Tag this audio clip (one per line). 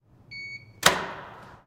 Lock
Automatic
Door
Keyfob
RFID